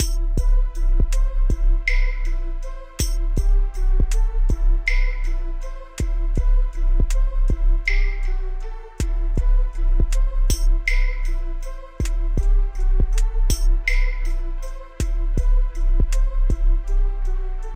Rap / Hip-hop Loop [2014]
Hope you enjoy, leave feedback please! Created with FL studio using "Trappy Kick - Ollie Ollie" Sawer and a few preset sounds that were included with FL.[August 20, 1:30 am] Florida
2014, Hip-Hop, horror, New, Rap, Trap